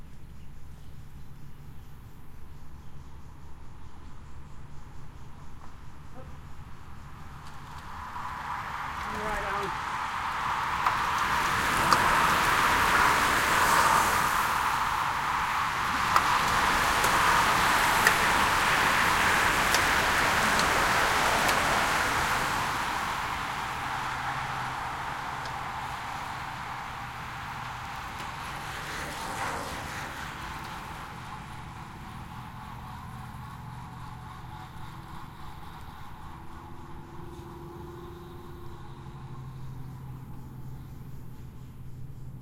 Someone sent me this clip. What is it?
passbys w talking 2
Group pass-by with talking then single pass-by.
Part of a series of recordings made at 'The Driveway' in Austin Texas, an auto racing track. Every Thursday evening the track is taken over by road bikers for the 'Thursday Night Crit'.
bicycle, field-recording, human, nature